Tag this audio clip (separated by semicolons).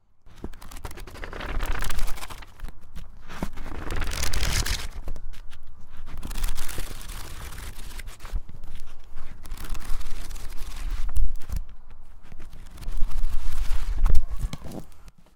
Book; Pages; Flip